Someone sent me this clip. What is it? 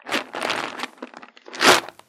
Shake, Pepples, Erratic Fast Shuffle
Foley for a bug scurrying along rocks in a glass tank. Fast, erratic hunting shuffling in the pebbles. Made by shaking a plastic jar of almonds.
sound, jar, nuts, falling, shuffle, bug, terrarium